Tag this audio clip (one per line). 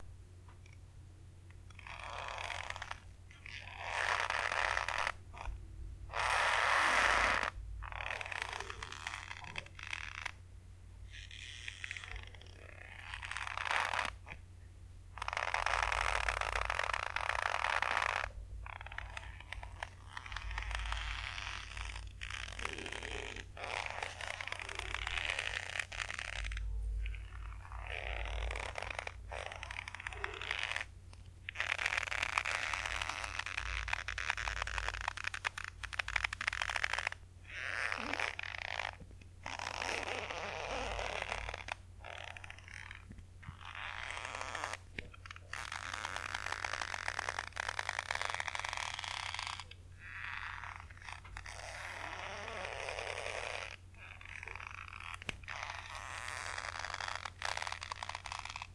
hanging
rope
sfx
tension
tight
twisting